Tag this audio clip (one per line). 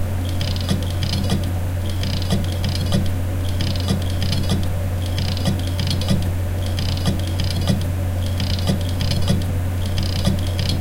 ambient
effect
field-recording
sample
sound